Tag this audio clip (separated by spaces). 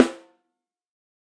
13x3 drum electrovoice multi pearl piccolo re20 sample snare steel velocity